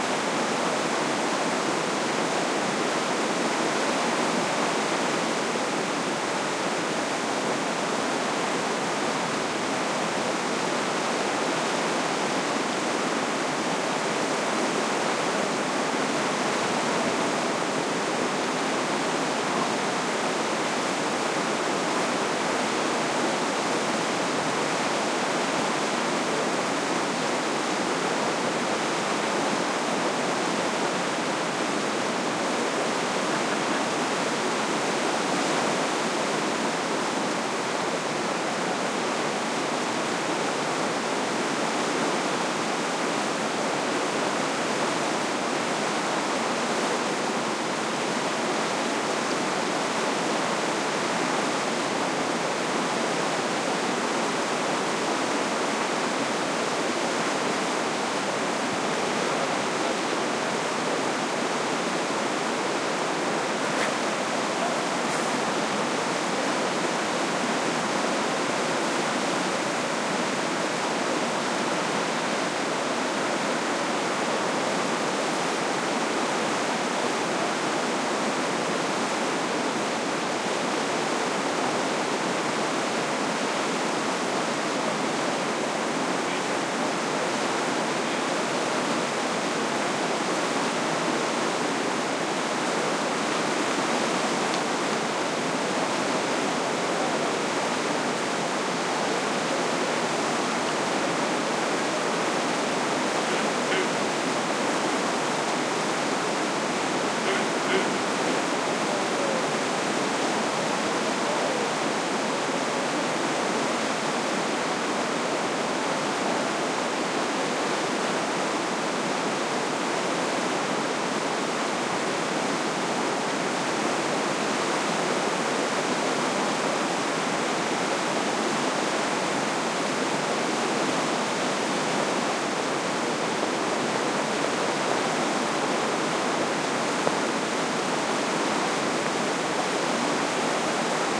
noise of running water, as made by the Guadalquivir River flowing high. Recorded right below the Calahorra tower, in Cordoba (S Spain) with PCM M10 recorder internal mics